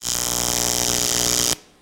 Electricity Shock 4 Full
Sound of electricity. Generated lo-fi sfx
abstract, effect, electric, experimental, fx, generated, lo-fi, low-fi, sci-fi, sfx, shock, sound-design, sound-effect, soundeffect